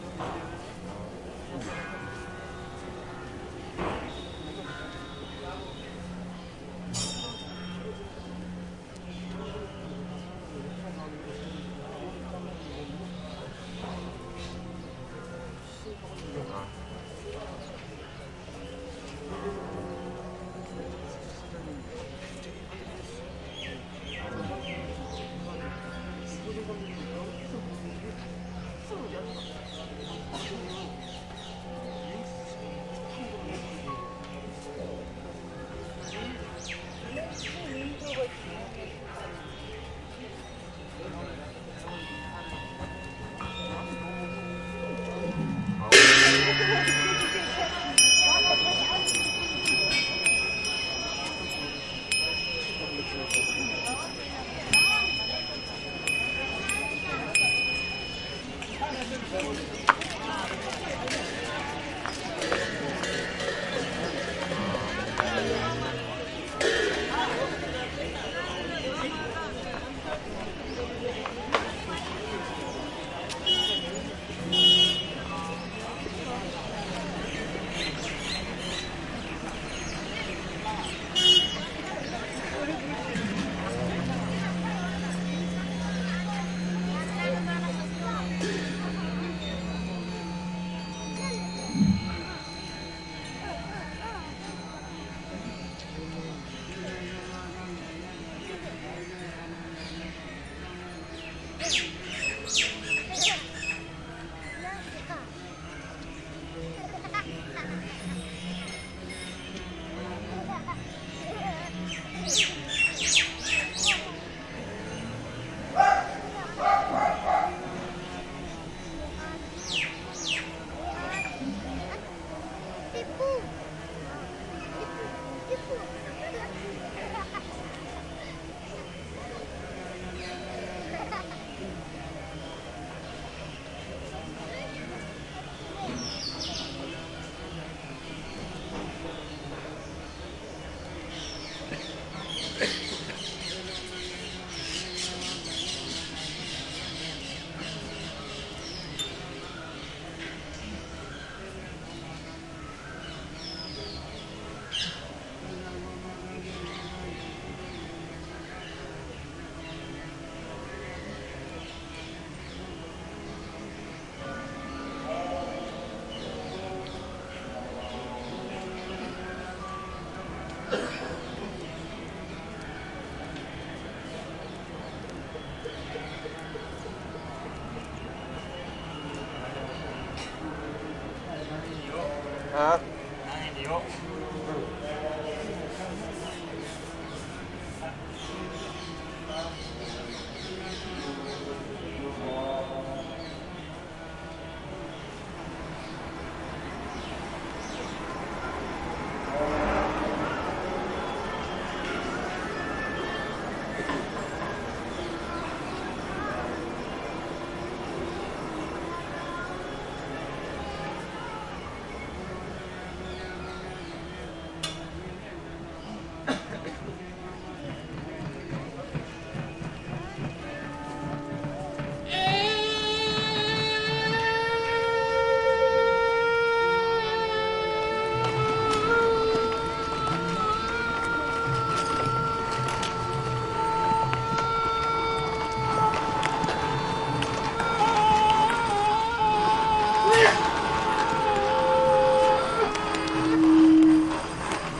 India Bodhgaya 2013 + Mix (nagra LB)